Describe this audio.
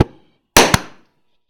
Torch - Ignite flame bang short
Gas torch makes a bang.
metalwork, torch, work, 80bpm, ignition, gas, steel, bang, 1beat, tools, one-shot